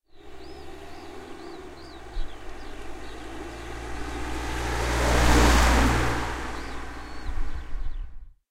car passing
Sample recorded with ZOOM H4 in Checiny in Poland. External mics have been placed on the level of the wheels.
ambience, field, poland, stereo